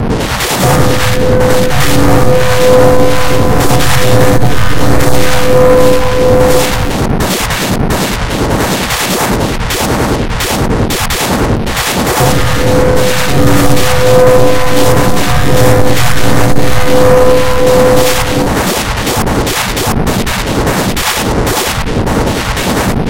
digital noise3
This noise sound is made by only LMMS.